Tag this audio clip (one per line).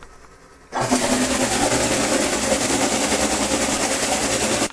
start
rotor
underwater
engine
boat
motor